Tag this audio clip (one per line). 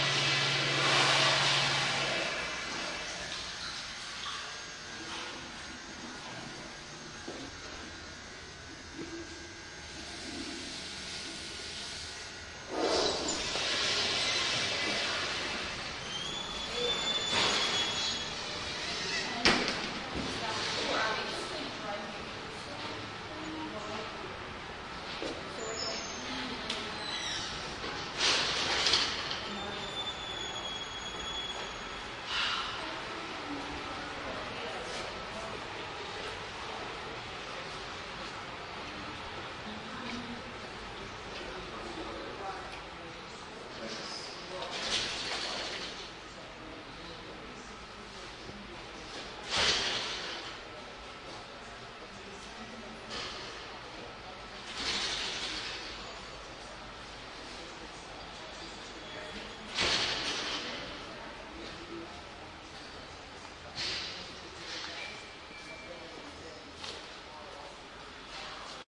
field-recording
road-trip